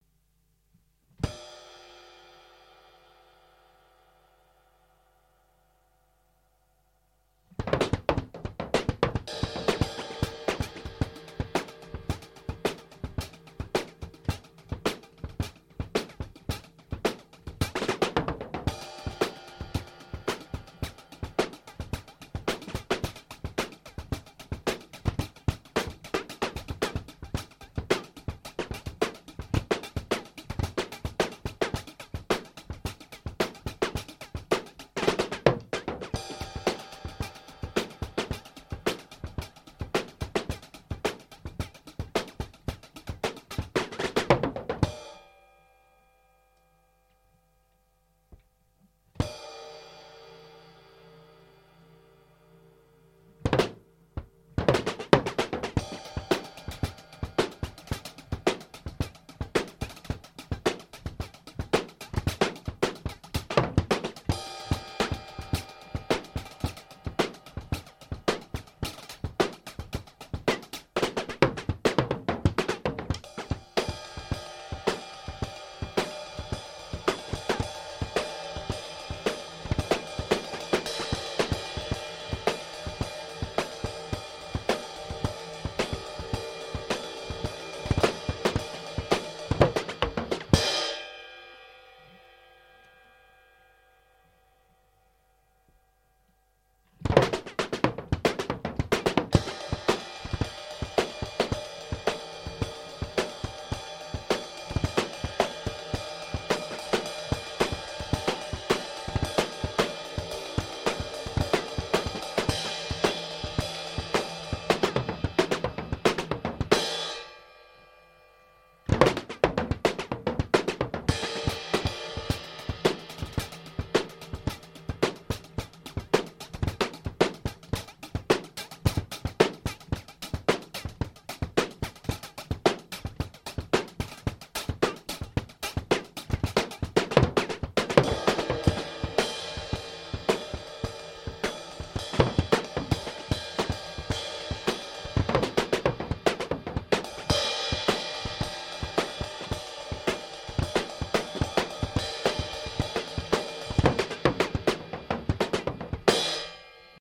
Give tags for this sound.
jazz london funk shark ace samples bournemouth robot drum free space time manikin download producer

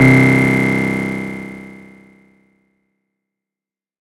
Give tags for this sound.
noise dark effect hardcore electronic sci-fi synth bpm random techno dub-step processed blip porn-core resonance bounce dance glitch-hop sound club acid rave synthesizer house 110 glitch lead trance electro

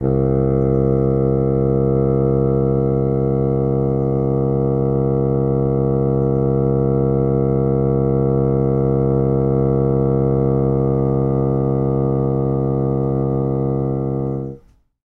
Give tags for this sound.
fagott wind

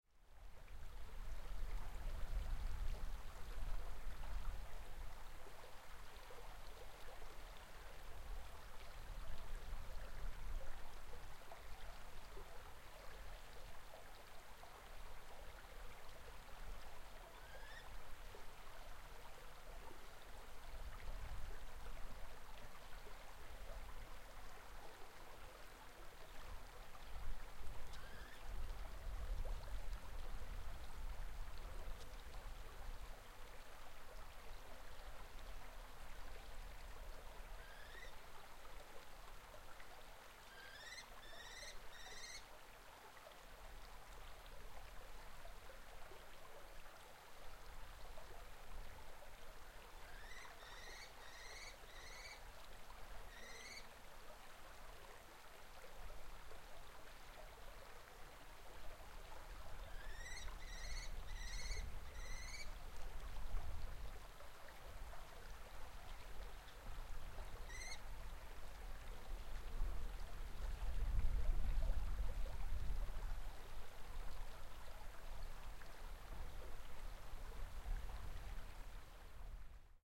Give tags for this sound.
atmos atmosphere Australia Australian field-recording kestrel nature water

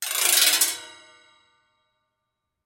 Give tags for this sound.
pipes marimba gliss resonance

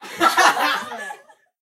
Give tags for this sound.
funny HAHAHAHAHAHAHAHA laugh laughing laughter lol prank

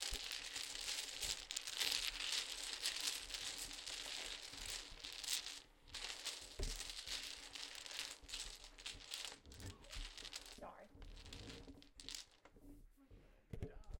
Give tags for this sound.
playing games cards

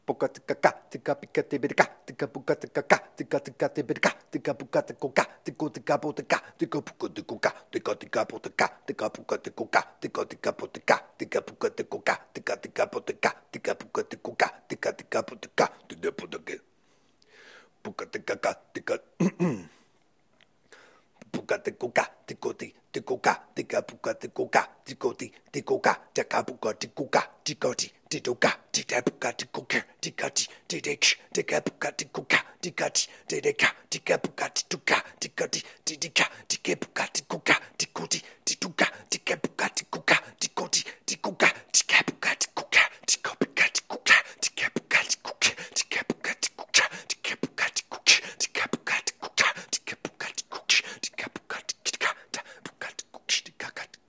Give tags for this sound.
bass beats chanting detroit looping loops strange

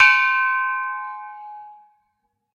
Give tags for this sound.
bottle gong percussion gas metal hospital

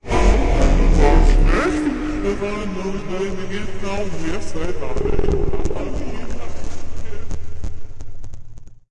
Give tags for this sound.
ambience fx voice

